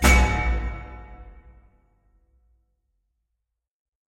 A cinematic percussion library for every serious composer